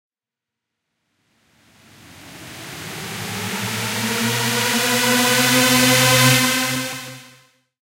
SL Uplifter 02 (v2)
uplifter piched up sound, created with Reaper, Synth1 VST, Wavosaur, Tal-Reverb3.